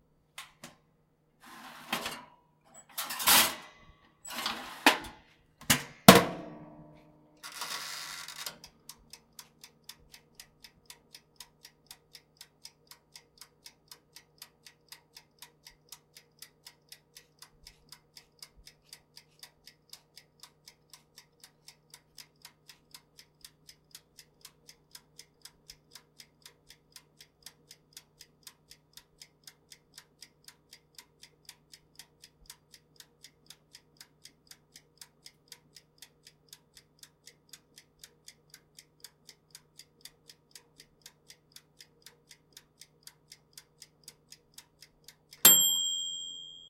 sound toaster oven timer clicking - homemade
Here's the ticking of my toaster oven timer and the ding at the very end.